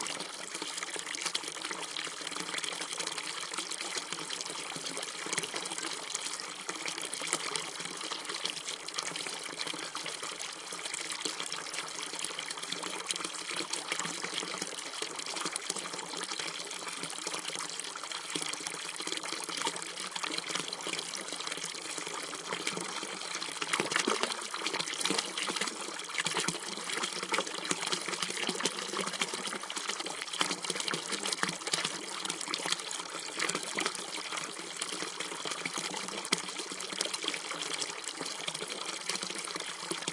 Fountain in the Senckenbach valley at Baiersbronn, Black Forest region in southern Germany. Zoom H4n